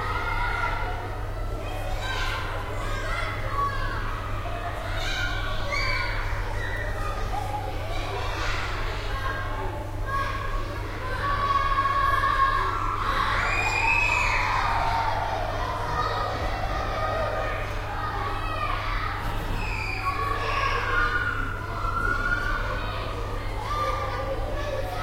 A playground in the south of France, children are yelling, screaming. There is also the noise of an air conditioning in the back. Rec with R09.